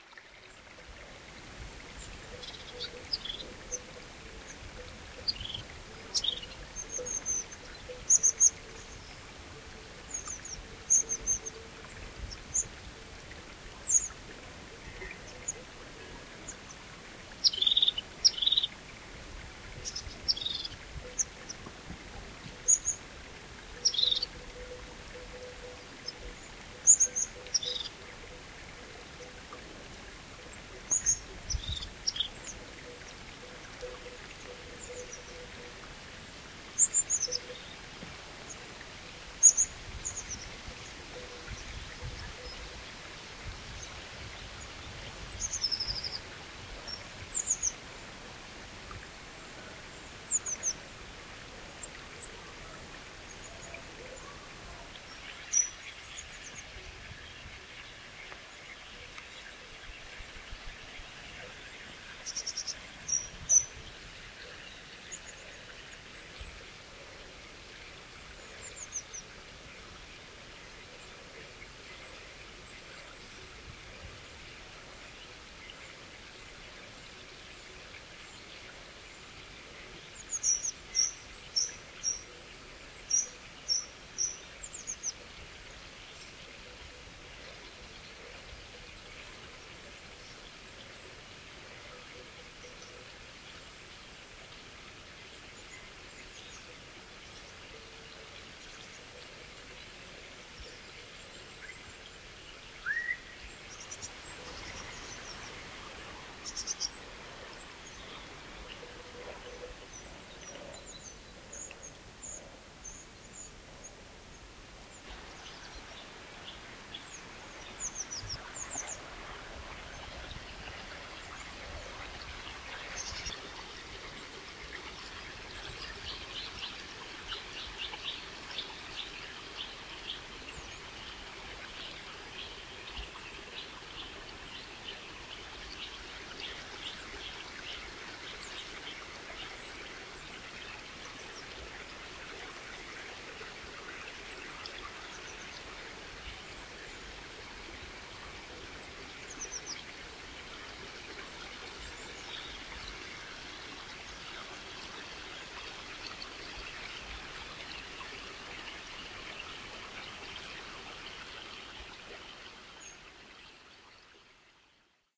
Herrerillo capuchino :: Crested tit
Paisaje sonoro del atardecer. Sonido de la garganta cercana, en primer plano canta un Herrerillo capuchino.
Soundscape sunset. Sound from a near gorge, in the foreground a singing Crested tit.
Grabado/recorded 20/07/14
ZOOM H2 + SENNHEISER MKE 400
birds, brook, crested-tit, herrerillo-capuchino, La-Adrada, mountain, naturaleza, nature, pajaros, Spain, water-stream